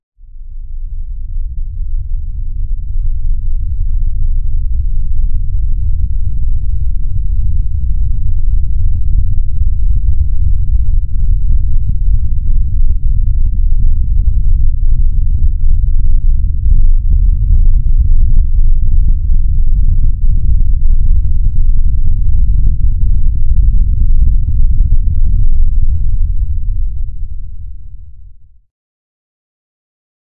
My attempt at a low rumble sound often associated with earthquakes. Created with Reason.